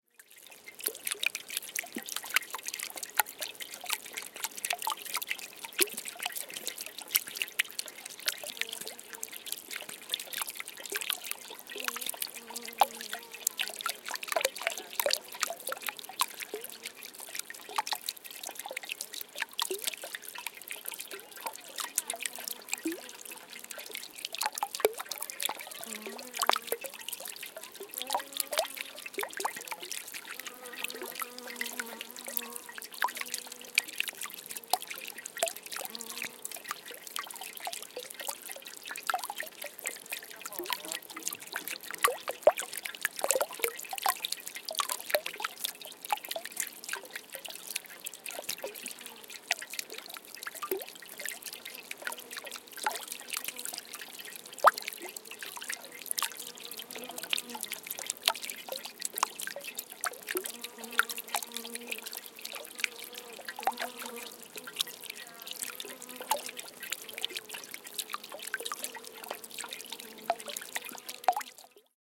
Medium Speed Dropping Water
Single Small Fountain recording, with Zoom H4